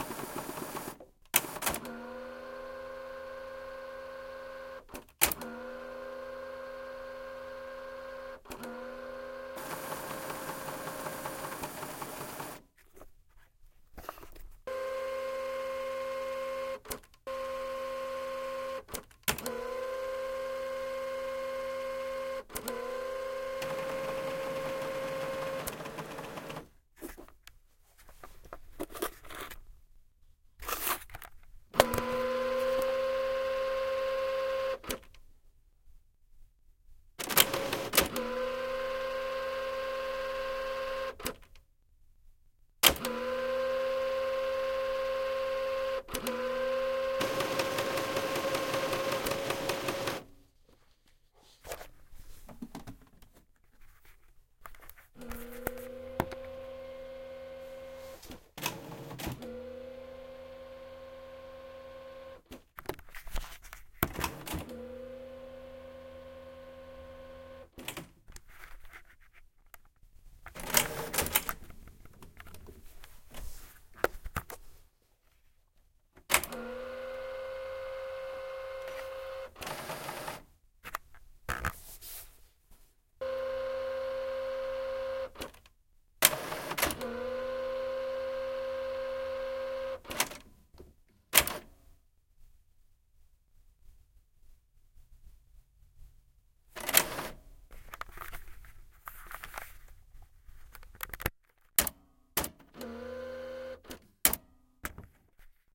Scanner Init-edit
This is a collection of sounds from a sampling session of my new scanner/printer combo. There are lots of percussion snaps, and motors/servos winding up. The same basic procedures are more or less repeated in several mic positions (front, back, side) so some sounds are more articulated than others. Recorded with an Edirol R-09